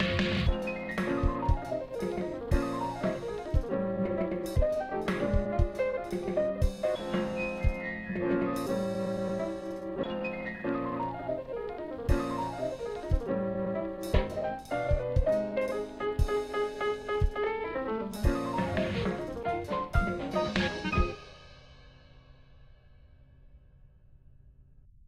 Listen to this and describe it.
Still work-in-progress created for Dare-41. This time a bit longer and a bit better. Finishing drum hits still need some improvement.
The bassdrum is this sound:
Cymbals are made from this sound:
The piano is made from slices and parts from the 78rpm recording. The snare drums are sliced from that recording also.